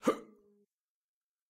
VOC Male Jump 01
A male voice (me) grunting while executing a jump. Recorded during a game jam.
voice, jump, male, grunt, vocal, breath, human